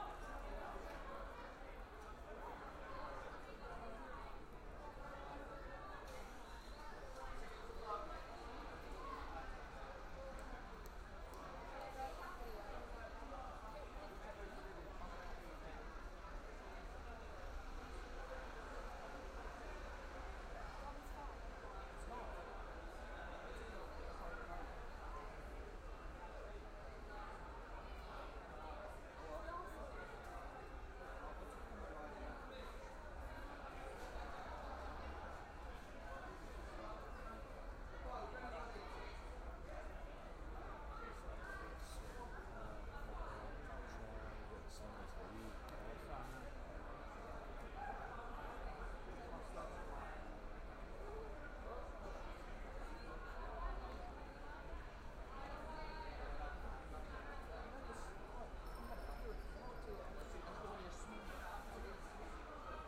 Finsbury Park on the day of the match 2017-09-24
While waiting at Finsbury Park station I recorded a short burst of the excited crowds emerging from the platform. A match was due to start in an hour or so. It's a pleasant chattering in a large, open space.
field-recording, London, UK, football, chattering